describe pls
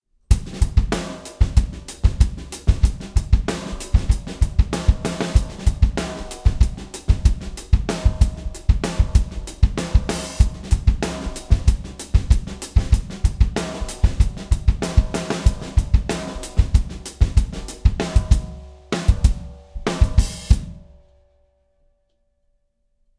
mLoops #7 95 BPM
A bunch of drum loops mixed with compression and EQ. Good for Hip-Hop.
BPM, Drum, Electronic, mLoops, EQ, Hop, Acoustic, Hip, Beats, Loop, Snickerdoodle, 150, Compressed